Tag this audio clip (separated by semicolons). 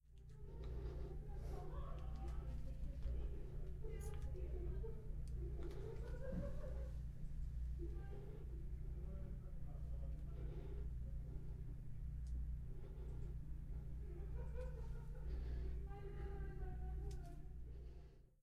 Murmullos; Murmurs; Susurros